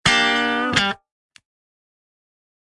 Funky Electric Guitar Sample 11 - 90 BPM
Recorded using a Gibson Les Paul with P90 pickups into Ableton with minor processing.
electric, funk, guitar, rock, sample